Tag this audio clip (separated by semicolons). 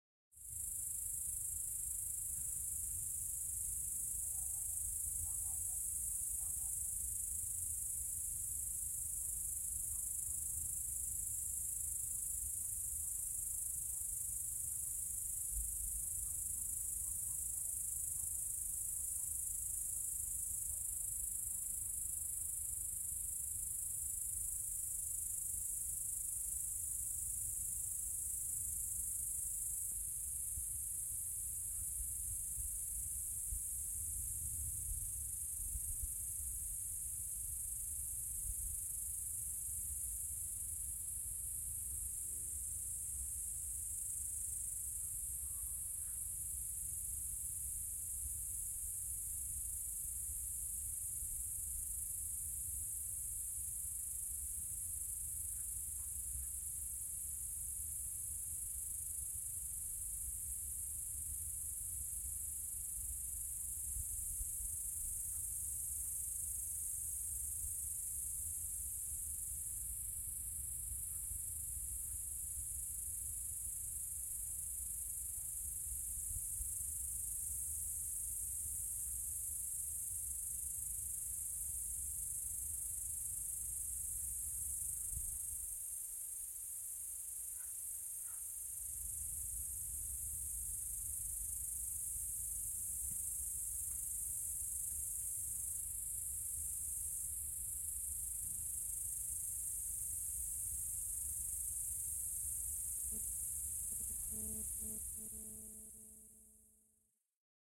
cicada; insect; night; summer